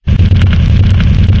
fire sound
fireplace, burning, fire, bit, flames, 16, flame